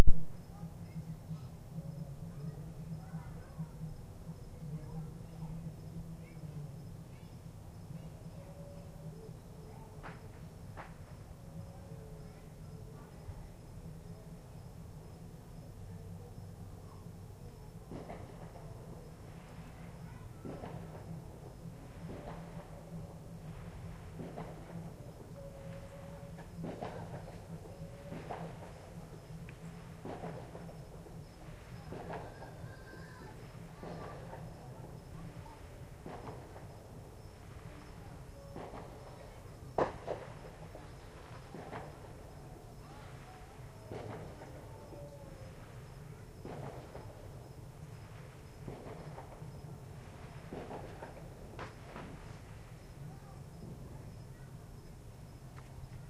A party in the distance and fireworks and firecrackers recorded with Olympus DS-40 and unedited except to convert them to uploadable format.